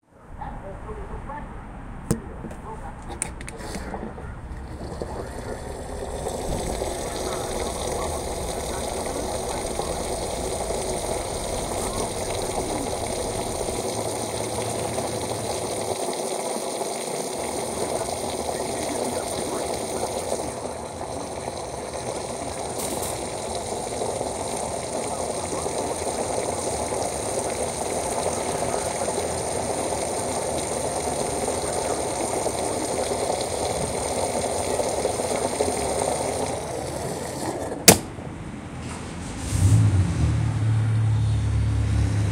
Gas Pump
A recording of a gas tank filling up and then clicking once the tank is full.
Recorded on a LG v20. Better sounding than that makes it out to be.
We uploaded this because apparently nobody records their gas and uploads it here.
station, petrol, fuel, click, gas, gasoline, pump, outdoors